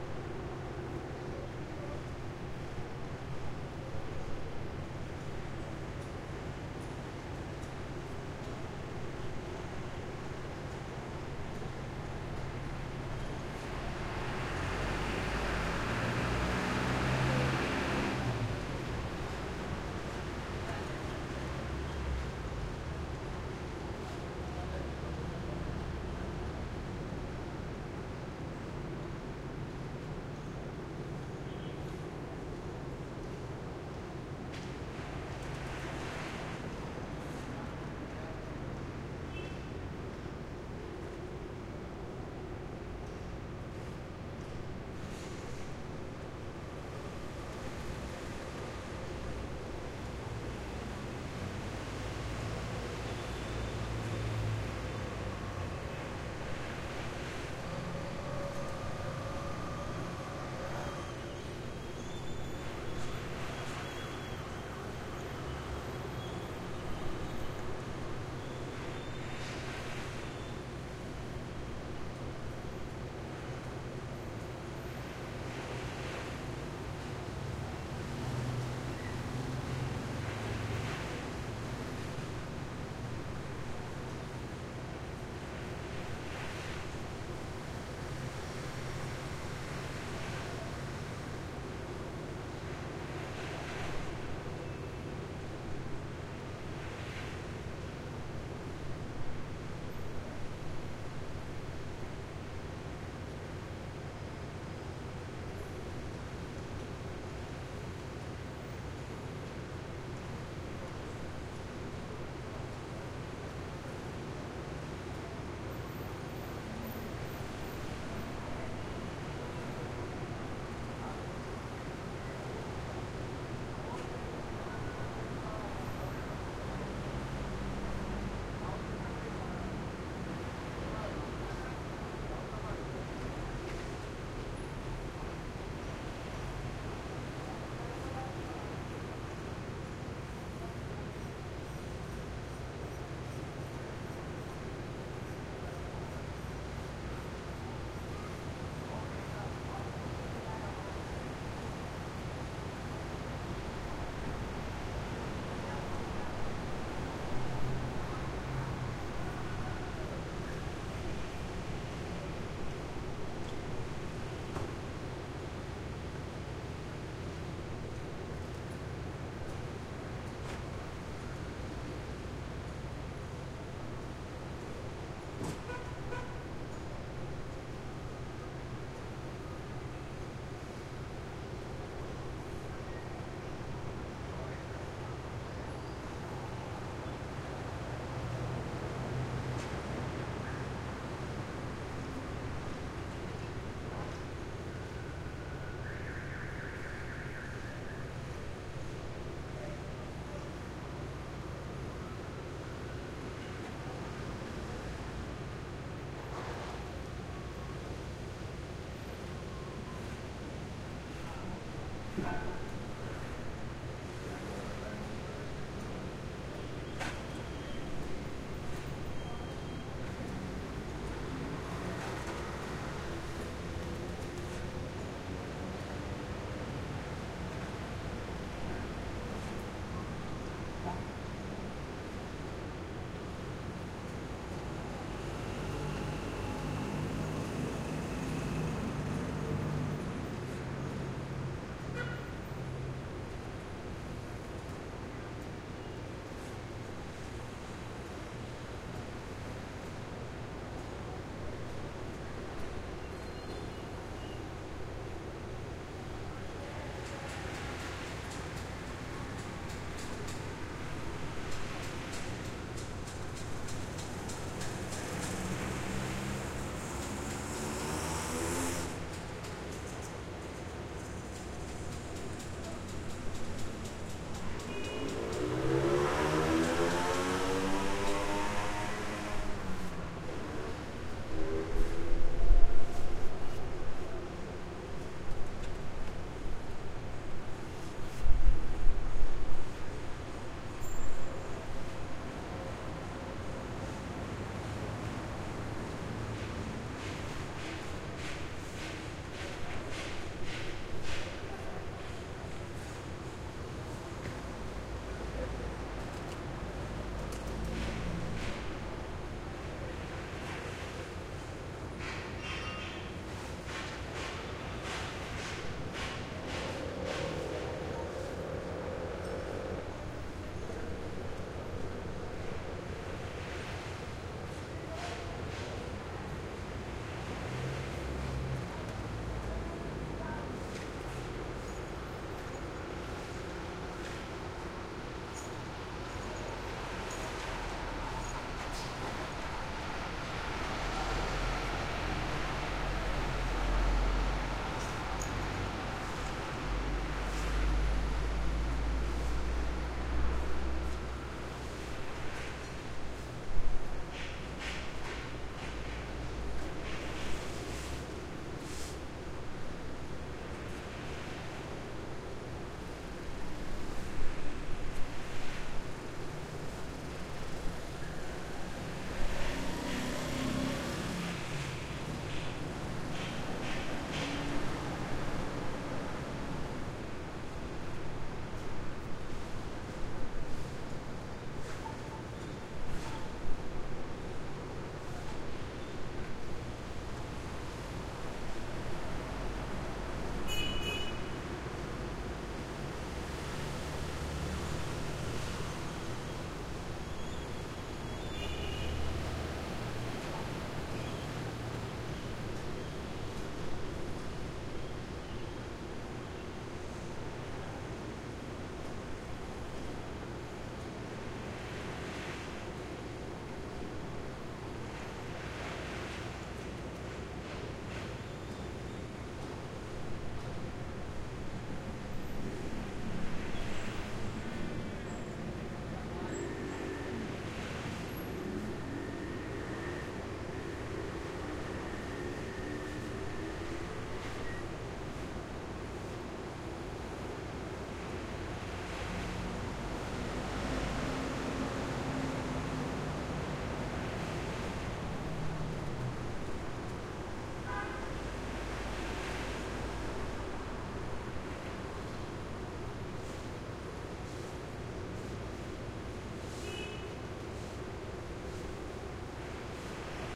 Santo Domingo-May 15-balcony
Soundscape recording on an apartment balcony above a street in the Colonial Zone, in Santo Domingo in the Dominican Republic. May 15, 2009.
zone, zona, domingo, street, republic, santo, balcony